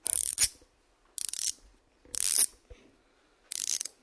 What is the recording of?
essen mysounds büny
germany, Essen, object, mysound